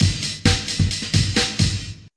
Slower than the others, but still good. This break was a mistake break that still has potential. I figured I'd keep it in case I needed it in the future. Made with VEXST's amen break kit in LMMS.
thanks for listening to this sound, number 67300
dnb, break, drum